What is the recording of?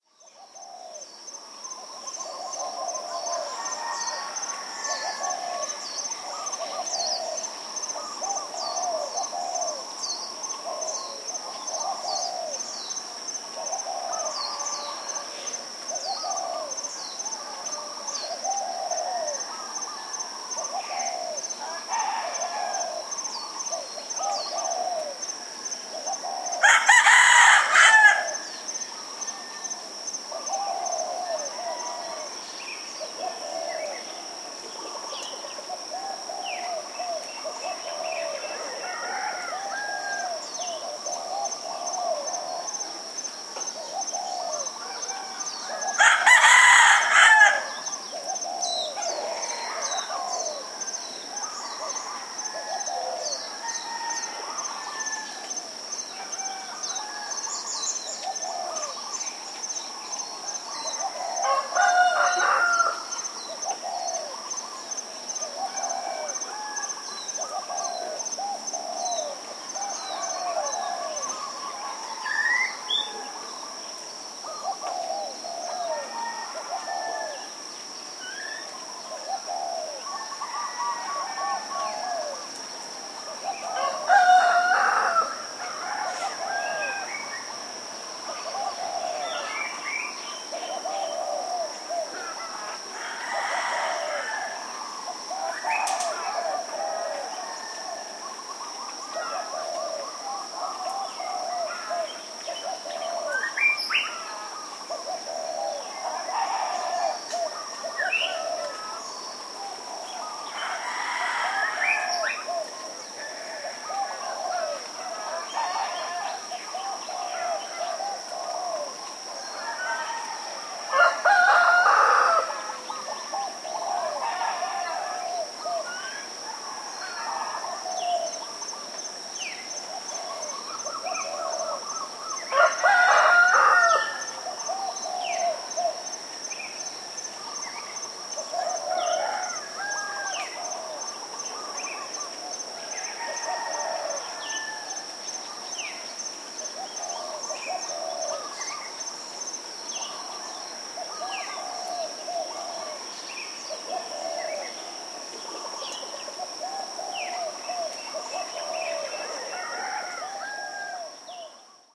Lokelani Ambience
This is a recording taken out my bedroom window early in the morning. You will hear doves, mynah birds, shama thrushes (the beautiful warbly one) and of course our "beloved" wild chickens.
hawaii, field-recording, birds, rooster